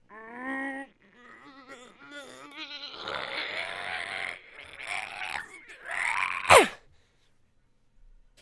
guy having trouble unloading his dump.
dump, grunt, human